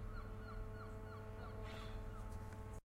SonicSnaps HD Mia&Meghan Seagulls
This is a sonic snap of some seagulls recorded by Mia and Meghan at Humphry Davy School Penzance
cityrings, humphry-davy, meghan, mia, seagulls, sonicsnap, UK